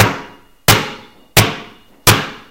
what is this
eduardo balon 2.5Seg 13
bouncing, ball, bounce